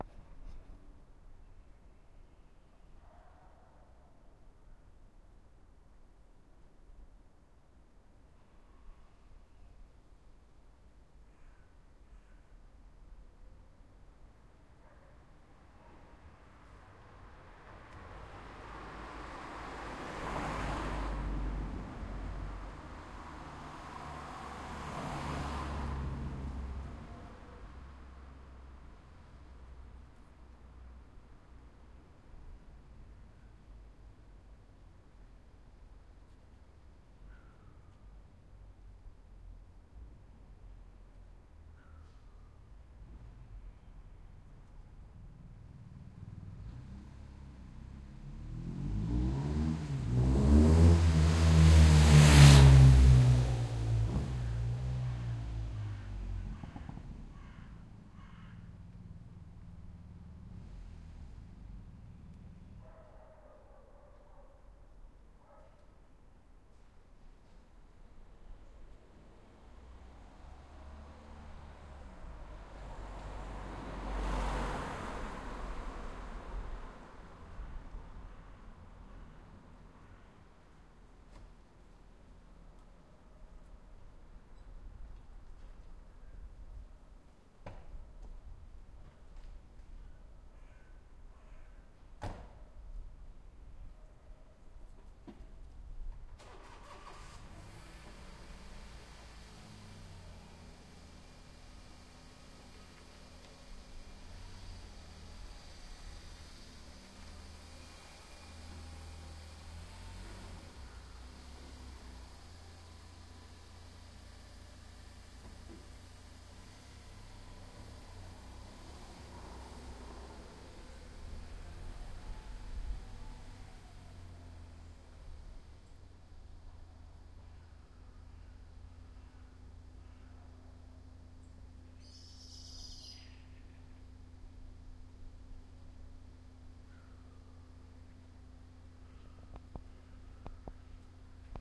Residential area Rome
Residential area in Rome - small street with few vehicles passing by
Recorded with Olympus LS 100 - Stereo